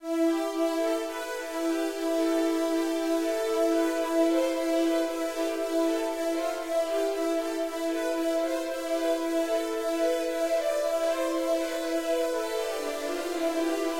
80s synth arpeggio ...
80s synth arpeggio
digital, soundscape, synth, 80s, drone, granular, evolving, analog, waldorf, multisample, multi-sample, experimental, pad, reaktor, electronic, space-pad, ambient, space, arpeggio